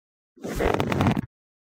Leather Stress 1 (Short)
Leathery sound made from my keyboard pressing against clothing. Cleaned up audio to remove any unwanted noise. 3 other variations of this sound can be found within the "Leather Stress" pack. Recorded on Sony PCM-A10.